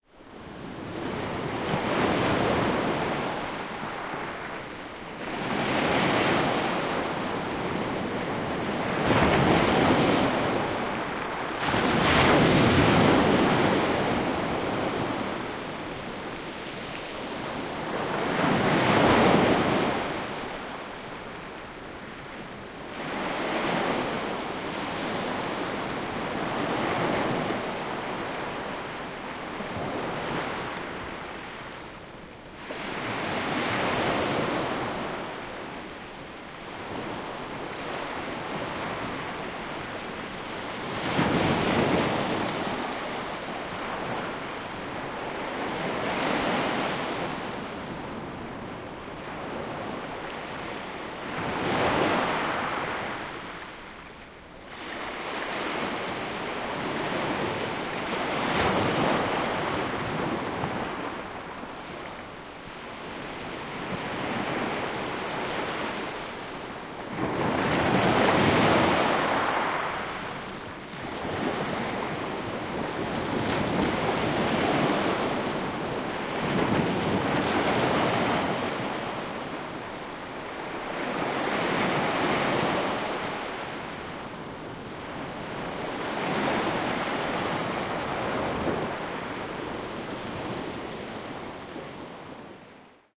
Close recording of waves crashing on the shore at Boscombe Beach, UK